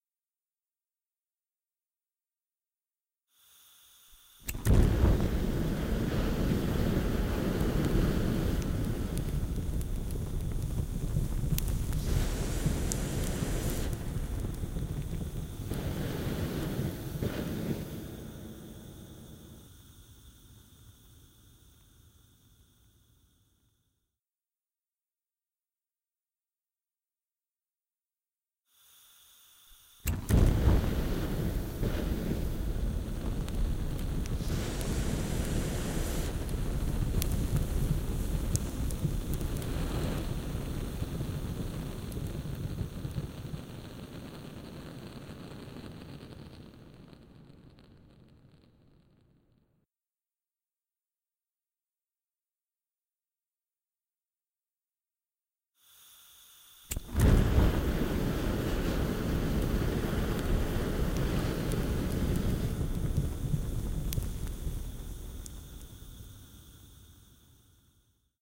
A flamethrower is used three times.
flame-thrower, flame, AudioDramaHub, burn, flames, fire, flamethrower, burning